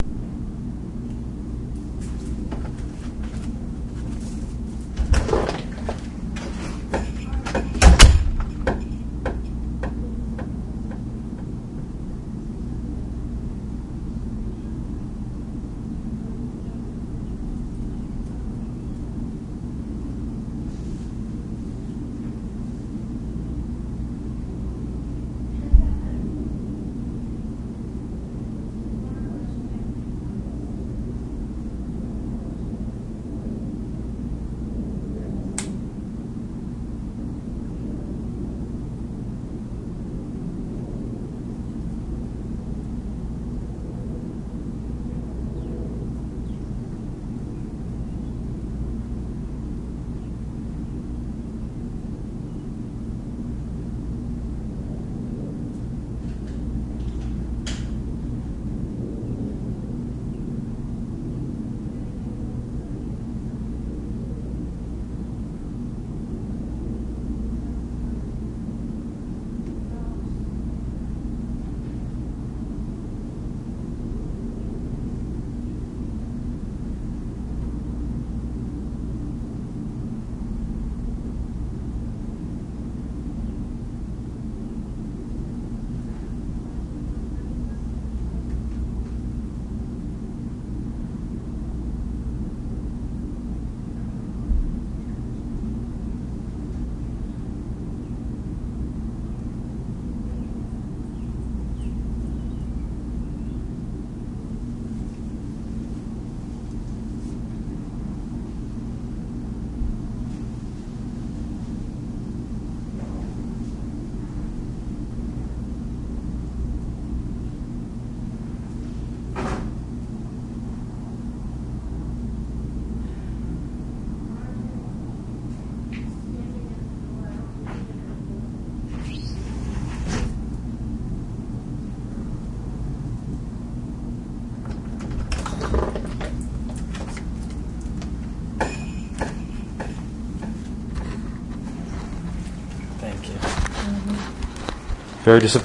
Outside on the patio for some reason.